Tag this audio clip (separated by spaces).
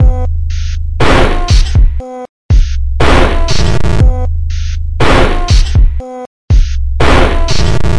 120 bit